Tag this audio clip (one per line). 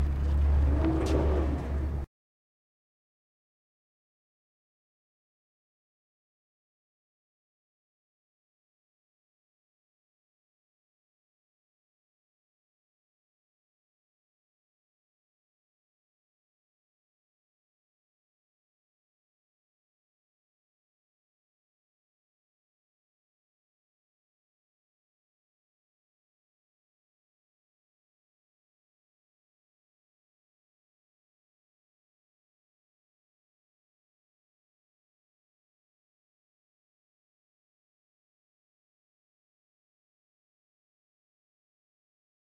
door
opening